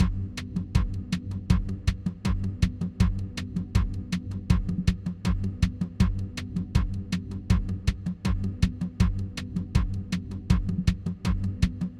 Loop with background synthetical voice and simple rhythm
loop, percussive